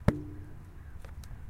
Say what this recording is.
Metal coated tree root 1 soft mallet
Metal coated tree with mallet and stick samples, recorded from physical portable recorder
The meadow, San Francisco 2020
metal metallic resonant percussive hit percussion drum tree field-recording industrial impact high-quality city
high-quality, industrial, metallic, resonant, tree